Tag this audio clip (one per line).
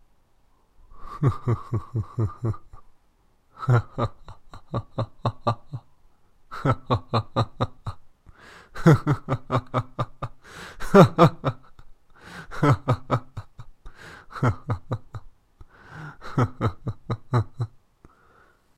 ghostly
evil
laugh
ghost
ghost-laugh
laughing
horror
horror-laugh
haunting
evil-laugh
villain
scary
villain-laugh
evil-laughing
disturbing
scary-laugh
creepy